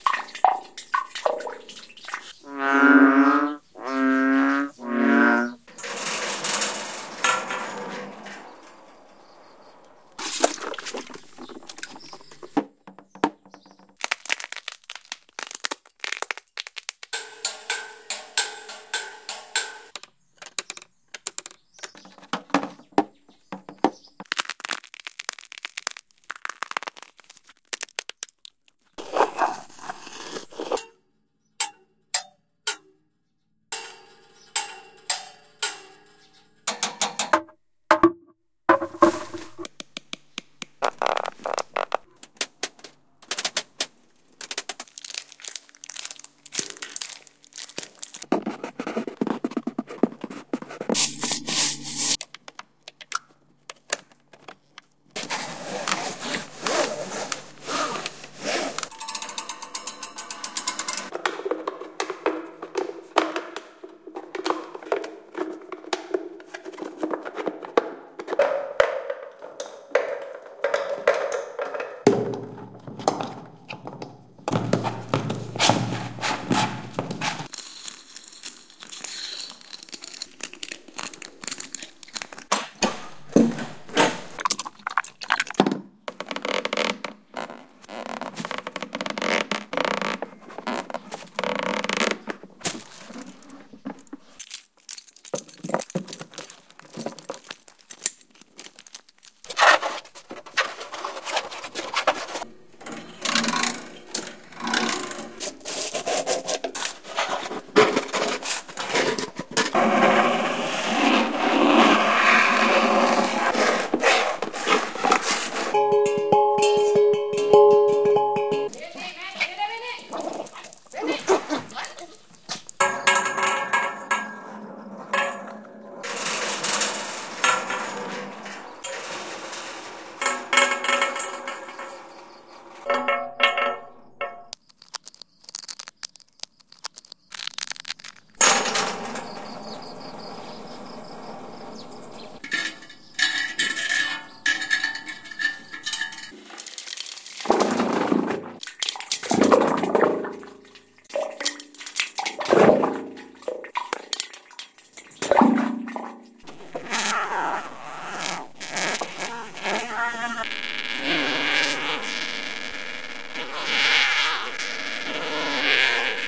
Formatted for use in the Makenoise Morphagene eurorack module. These live acoustic recordings i made with a Sony PCM M10 around my house / farm in Thailand. They concentrate on the percussive hits, scrapes, cuts, frogs, insects, water wells, metal farm things, gongs, railings, fans, crushed snail shells, creaks, dogs, drips and clicks. Useful for musique concrete artists.